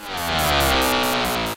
Weird noise thingy that pans.